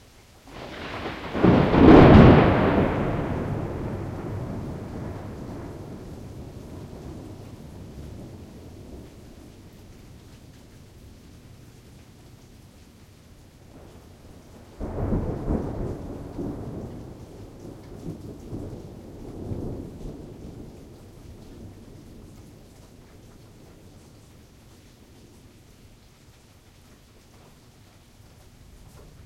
CracklingThunder LtRain
Crackling thunder and light rain. Summer storm. Midwest, USA. Zoom H4n, Rycote Windjammer